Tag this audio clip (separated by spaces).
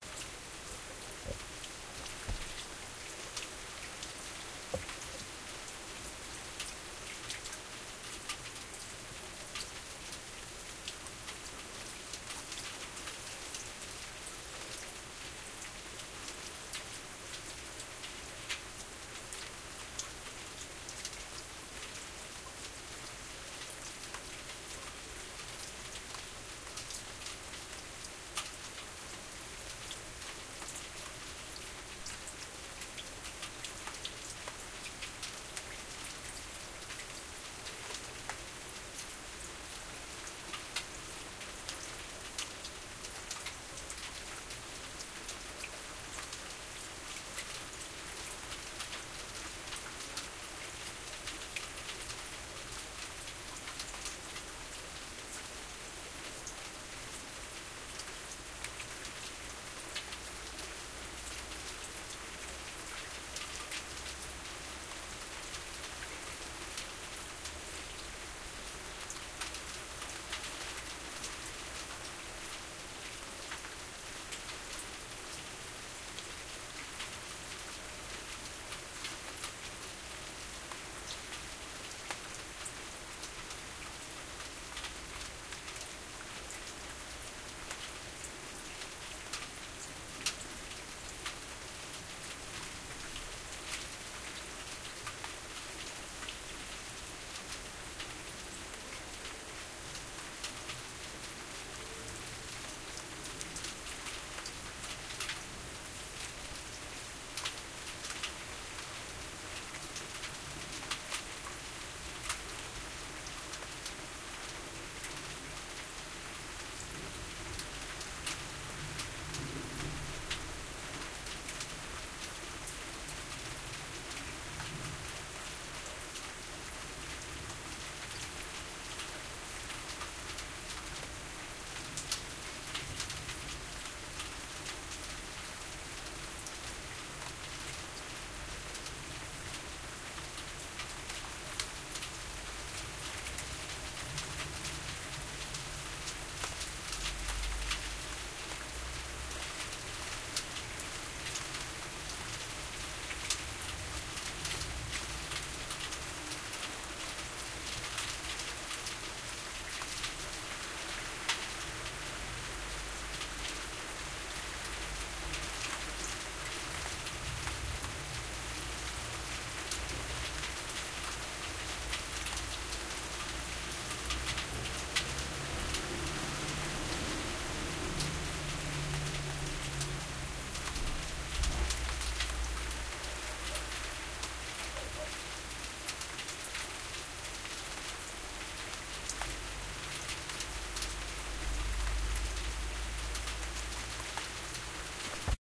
Rumble Roof Storm Thunderstorm Thunder Weather Metallic